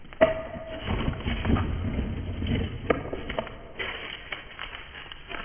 Dropping wood into a box 04
Dropping wood into a box
Digital recorder - Audacity
impact, hit, block, crash, percussion, colide, colliding